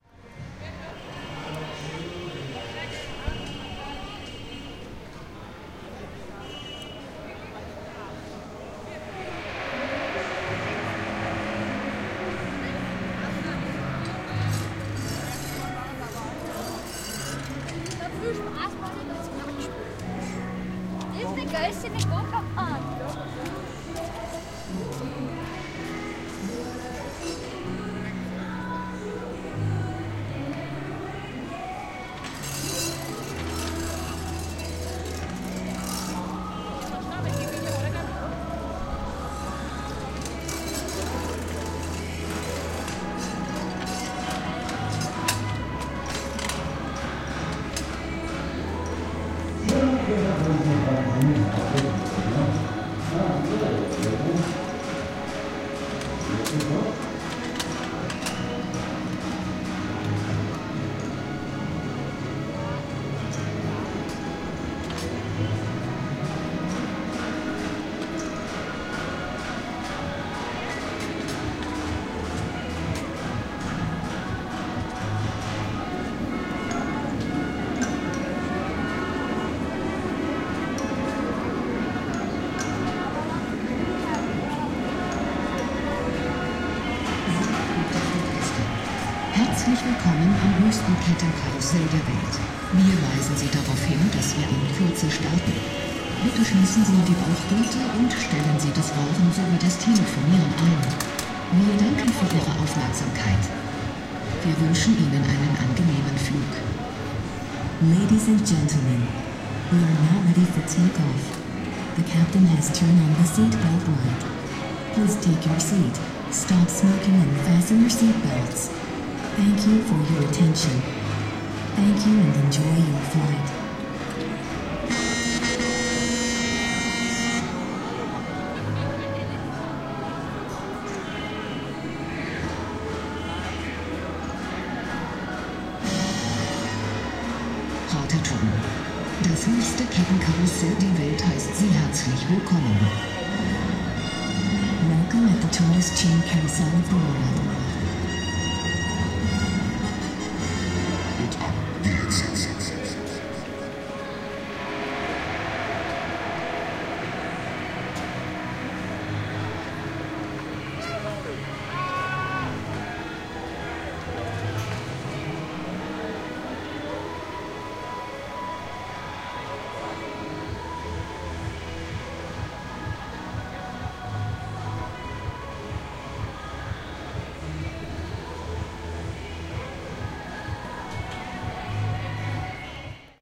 Prater 5 Karussell b
Recordings from "Prater" in vienna.
field-recording, vienna, prater, leisure-park, people